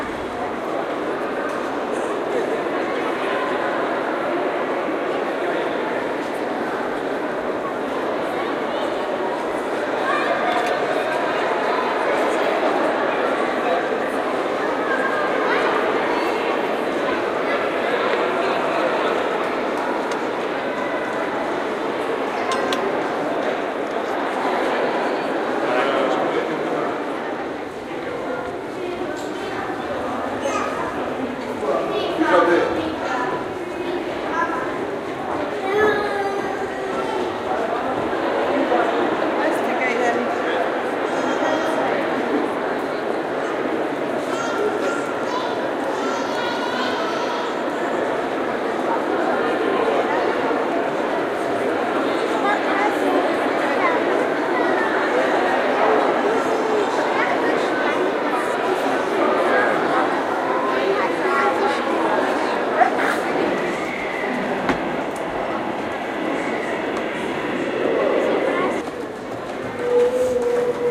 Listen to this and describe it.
London Museum Inside 1
London, inside a museum. Large hall, indistinguishable voices, footsteps, lots of people, high ambience noise.